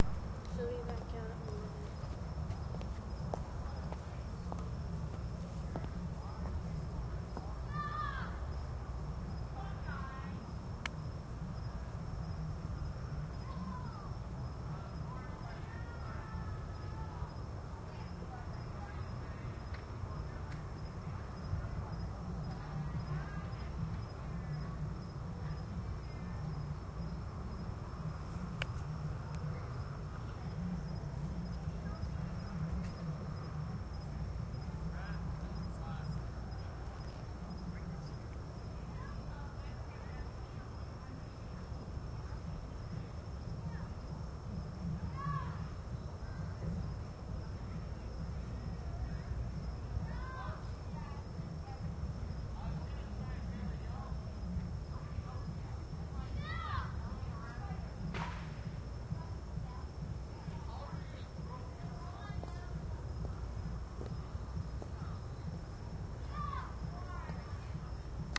newyears party2
A party in the distance and fireworks and firecrackers recorded with Olympus DS-40 and unedited except to convert them to uploadable format.